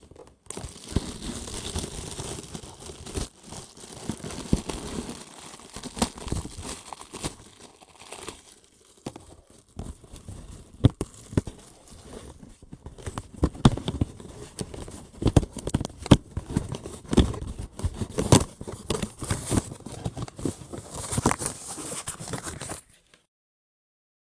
extracting an item out of a small cardboard box with microphone inside the box